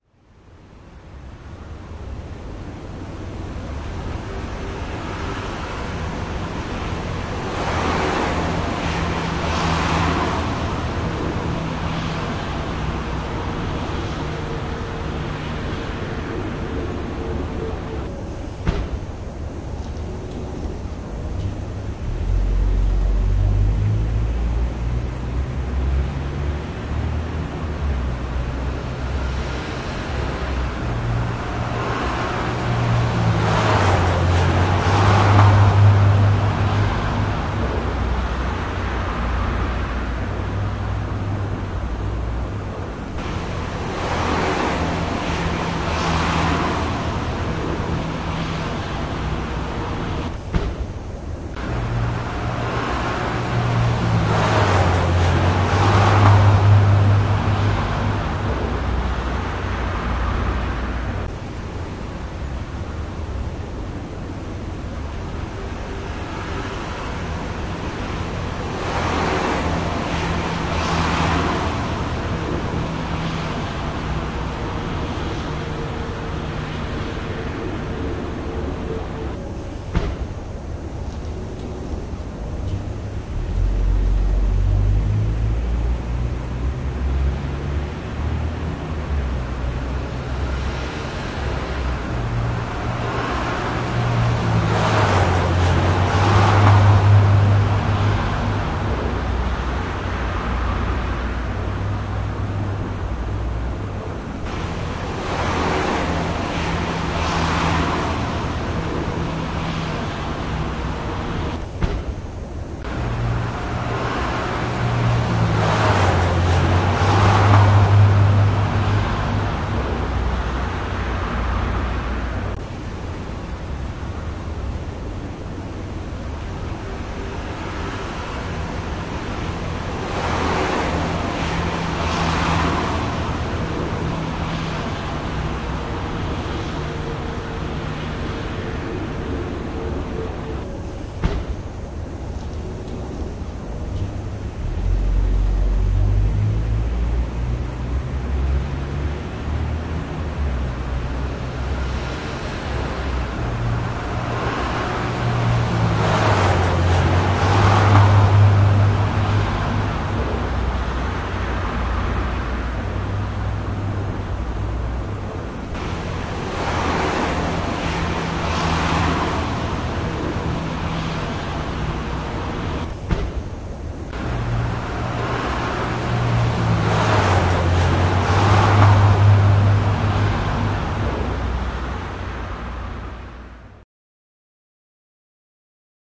Heavy residential car traffic.